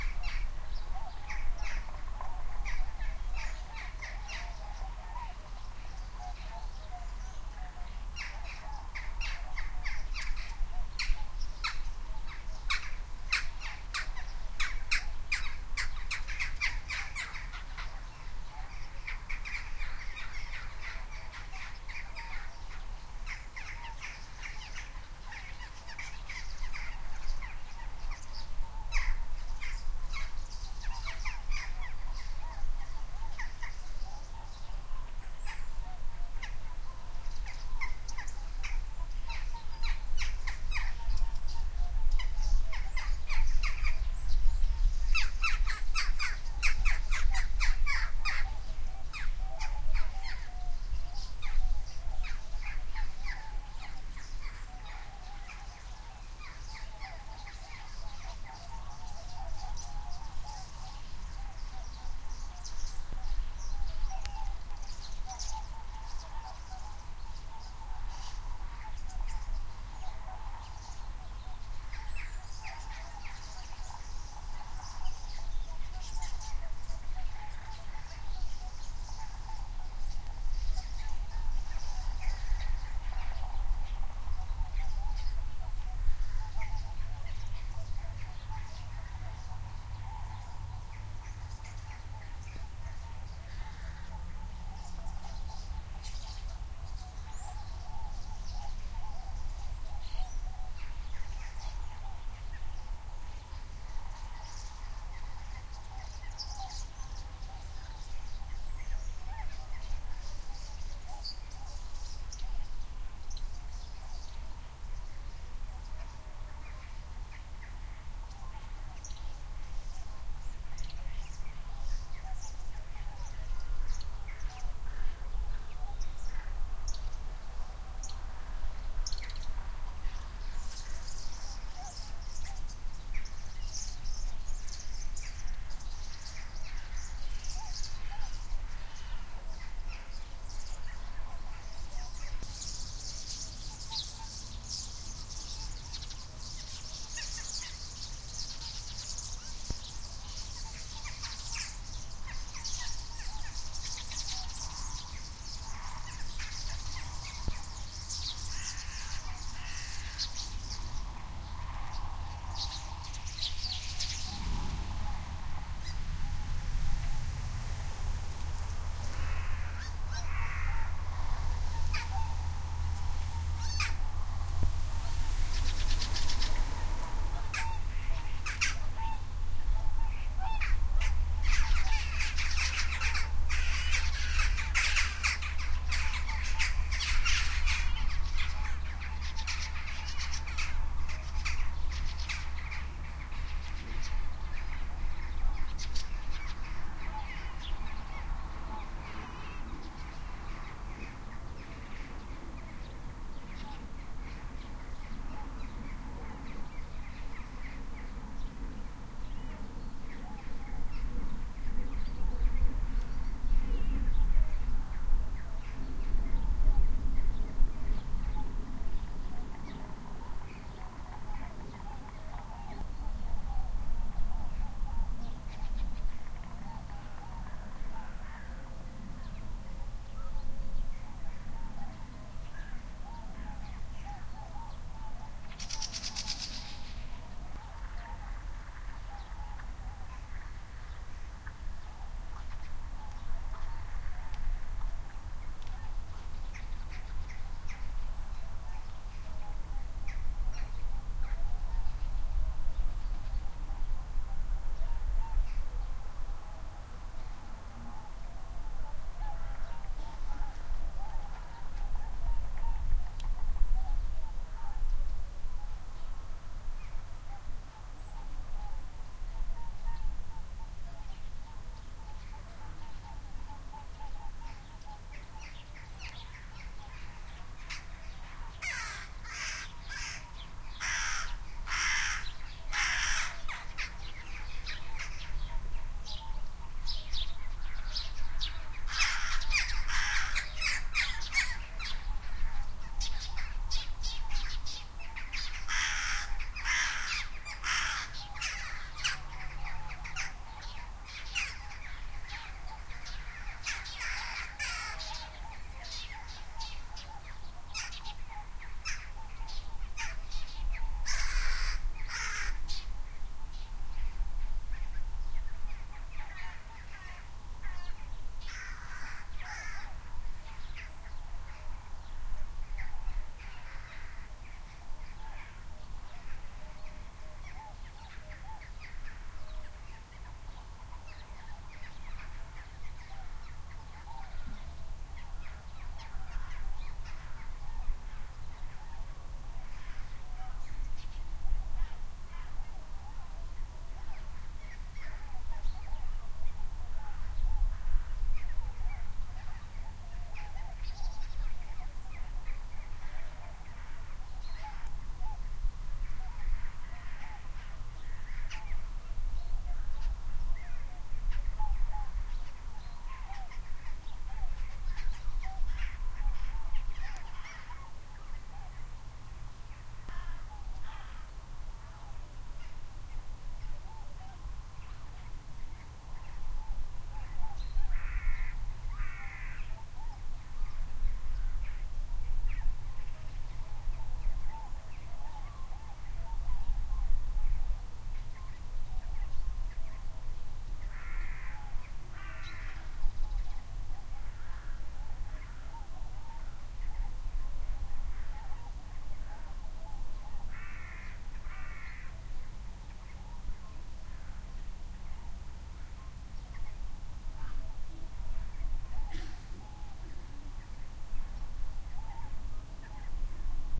Saturday morning birds
Recorded early a Saturday morning. There's a lot of birds, but if you listen closely you can hear a lot of geese on a nearby field, breaking up. There's a couple of fly overs, and some birds arguing every now and again.
Recorded with a TSM PR1 portable digital recorder, with external stereo microphones. Edited in Audacity 1.3.5-beta
birds, morning, fieldrecording, geese